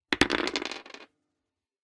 Rolling dices.
{"fr":"Dés 3","desc":"Lancer de dés.","tags":"de des lancer jouer jeu"}
dice, dumping, game, roll, rolling